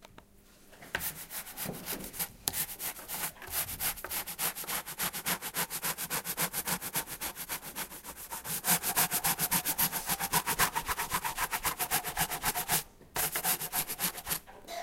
mysound Regenboog Shaima
Sounds from objects that are beloved to the participant pupils at the Regenboog school, Sint-Jans-Molenbeek in Brussels, Belgium. The source of the sounds has to be guessed.
Belgium, Brussels, Jans, Molenbeek, mySound, Regenboog, Sint